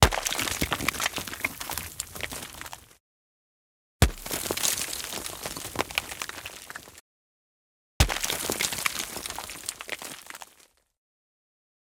Foley Impact Stones Strong Debris Stereo DS
Impact on Stone (x3) - Strong with debris.
Gears: Zoom H5 - Tascam DR05 - Rode NTG4+
dr05, rode